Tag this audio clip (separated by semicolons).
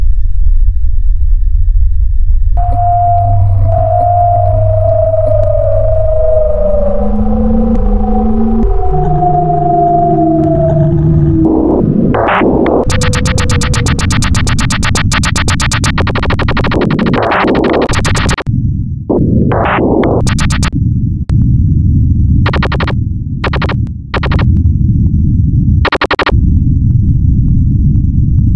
gun; tatatatatat; machine; hammering